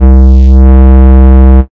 FUZZY BASS SAW